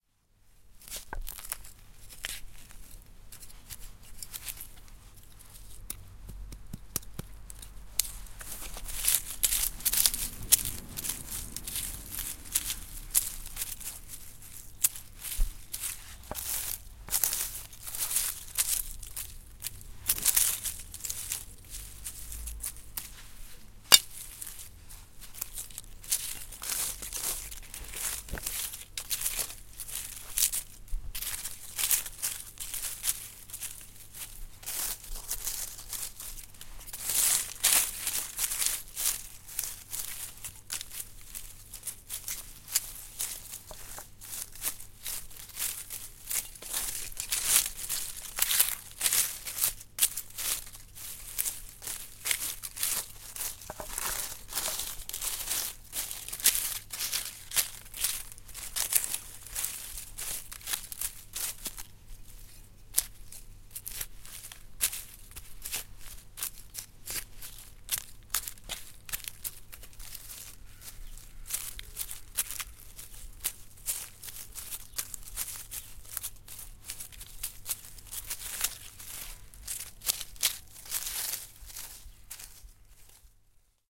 Sound of someone who’s making gardening. Sound recorded with a ZOOM H4N Pro and a Rycote Mini Wind Screen.
Son de quelqu’un faisant du jardinage. Son enregistré avec un ZOOM H4N Pro et une bonnette Rycote Mini Wind Screen.
countryside, garden, gardening, ground, rake, shovel